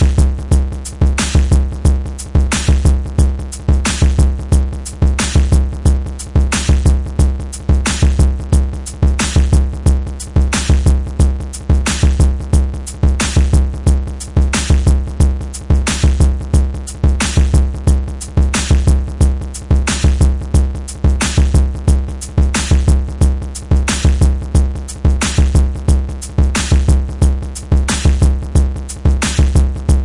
dope distorted beat
beat, distort